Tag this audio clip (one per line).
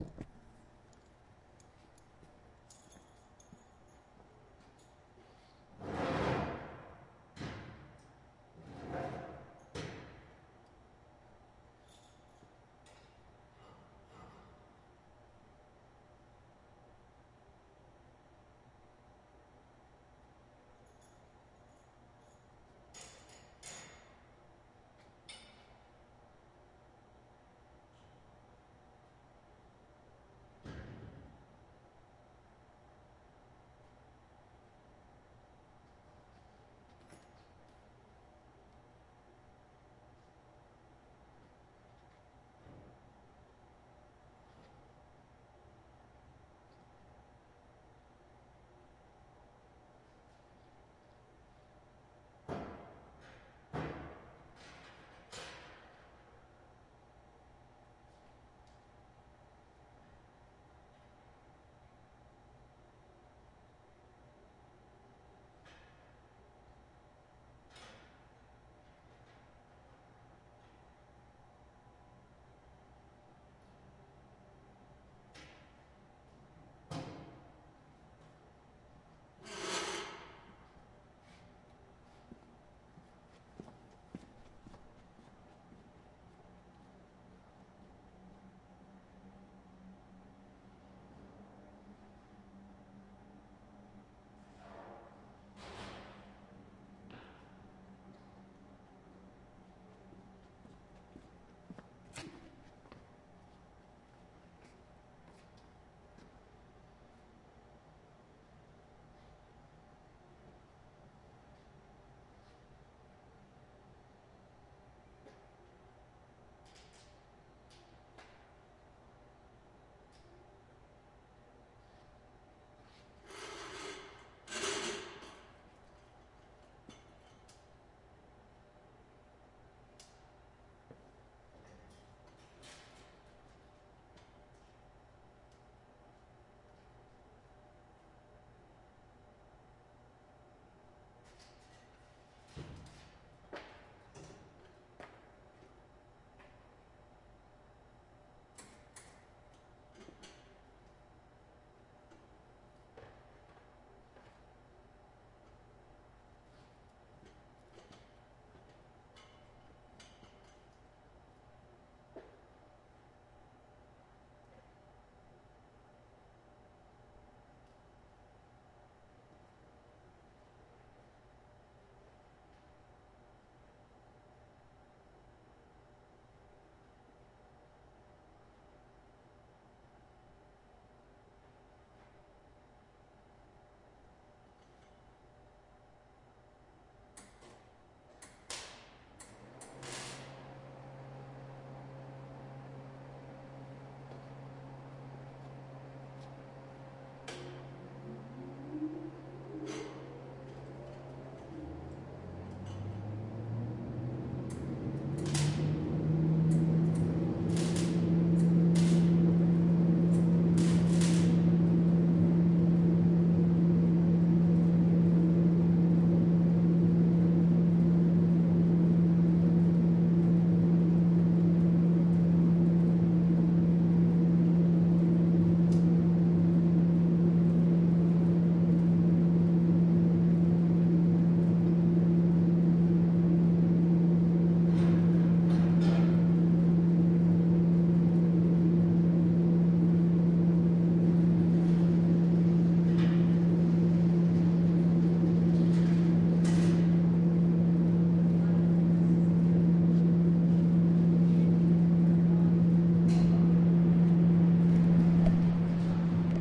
Prep
Welding
Exhaust